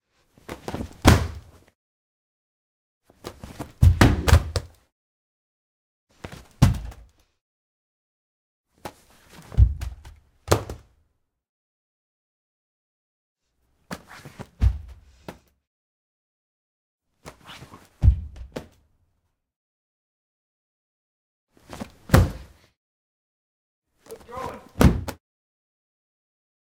laptop throw against wall thud slam roomy various